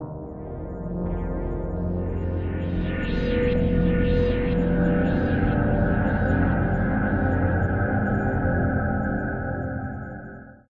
Layered pads for your sampler.Ambient, lounge, downbeat, electronica, chillout.Tempo aprox :90 bpm
chillout, sampler, electronica, synth, downbeat, texture, pad, layered, lounge, ambient